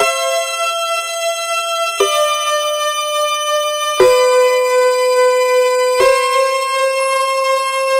Nord Lead 2 - 2nd Dump
ambient, background, dirty, idm, melody, tonal